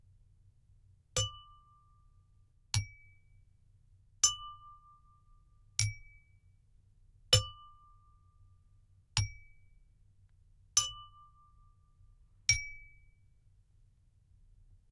Simple tapping of glass with a two different sized spoons.
Confederation College Interactive Media Development - IM314 Sound & Motion III.
clang, glass, glassware, spoon, tap, tapping